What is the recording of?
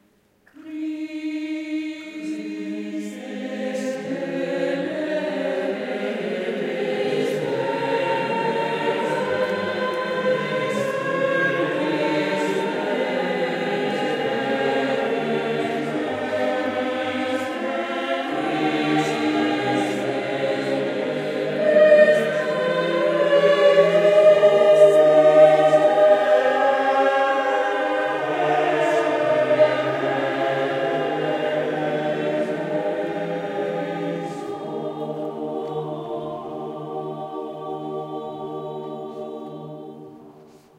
20110520.TLVictoria Kyrie e leison
fragment of choral piece by 16th century Spanish composer Tomas Luis de Victoria, as performed by Coro del Ateneo de Sevilla on May 2011 at Iglesia de San Alberto, Seville. MKH60 + MKH30 into Shure FP24, PCM M10 recorder. Decoded to mid-side stereo with free Voxengo VST plugin. Recorded with permission
spanish, heavenly, music, choir, polyphony